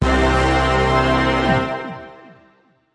Inception Horn Victory
cinematic, dramatic, epic, horn, inception, intro, massive, orchestral, powerful, soundtrack, sountracks, victory